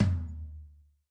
Rick DRUM TOM MID soft
Tom mid soft
acoustic, rick, stereo, drum